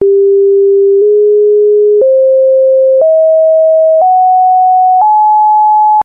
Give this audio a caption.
Created using Audacity
1 second intervals